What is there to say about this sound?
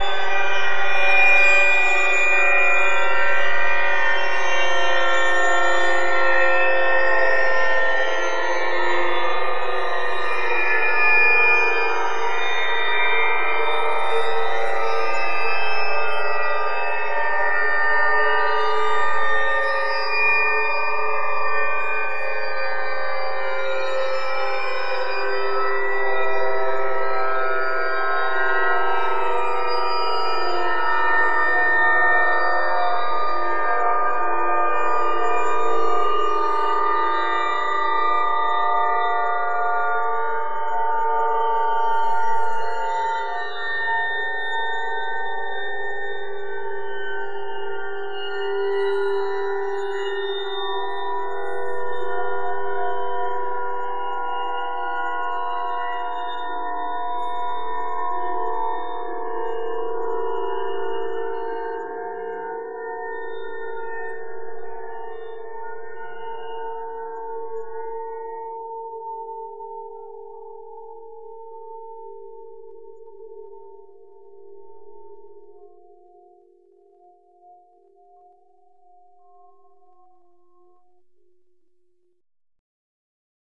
clarh tstch new S 03 EVLtheshining
A friend was travelling, stayed over, and brought a battered clarinet (they play saxophone usually)- I sampled, separated a few overtones, and put them back together.